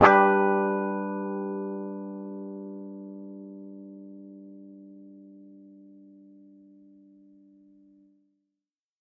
Clean D Chord
A guitar chord, probably a D.
Recorded for the purpose of testing out guitar DSP effects.
Recording details:
Gibson Les Paul Junior, P90 pickup, Mahogany neck, Ernie Ball Beefy Slinky 11-54, Dunlop 88mm.
Recorded through the instrument input of a Focusrite Saffire Pro 24.
Edited in Ableton Live, no processing other than gain and fade.